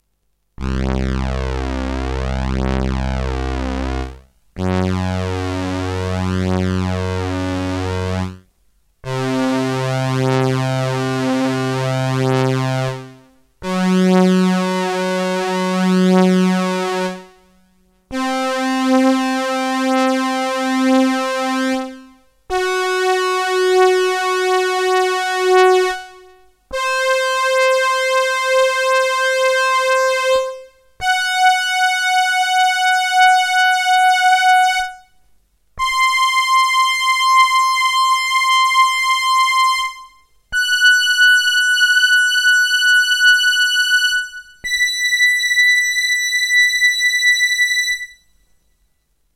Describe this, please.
RMIF Opus Strings
A multisample of Strings sound from vintage Soviet synthesizer RMIF Opus incl. internal chorus.Two notes from each octave (C and F#) were sampled.
String-Machine, RMIF, Vintage, Opus, Synthesizer